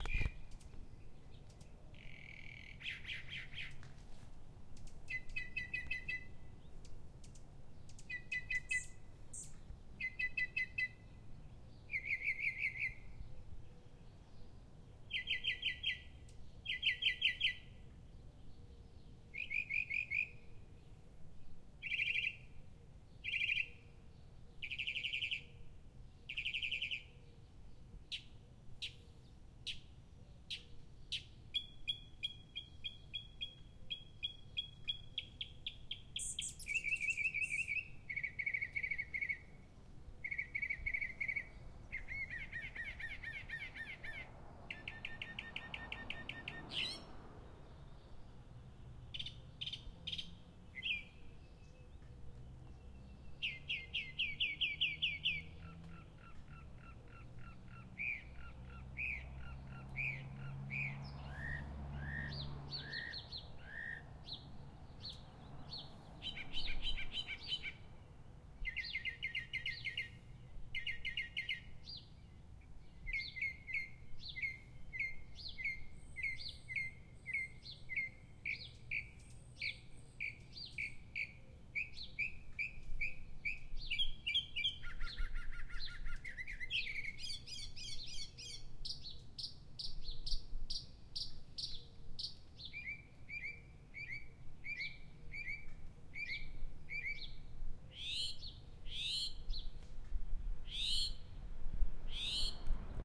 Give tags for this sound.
bird birds outdoor

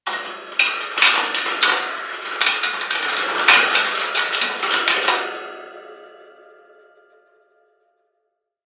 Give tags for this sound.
echo cd hands group ball hand cell dream contact compact industrial huge edit alert impact design disc audio frontier gigantic impulse cup converters evil dark cool enormous dreamlike big bizarre

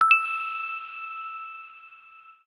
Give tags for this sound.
coin diamond game item note object pick-up

